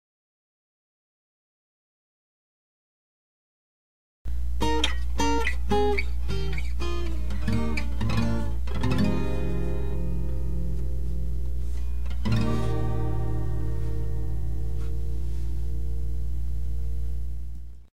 scales downward on my old guitar